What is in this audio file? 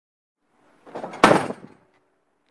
trash can
can, dirty, garbage, smell, trash